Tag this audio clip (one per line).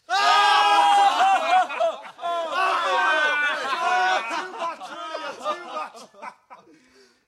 Men-Laughing
Off-Stage-Laughter
Small-Group-Laughter